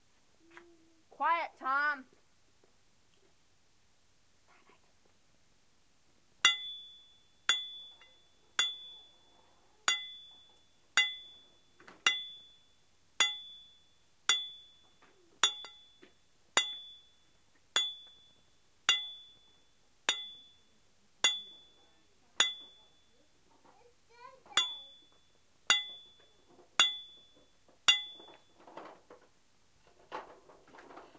Pounding a metal stake with hammer v2
Pounding a metal stake with a sledge hammer.
wham, pound, ground, metal, whack, stake, hammer, sledge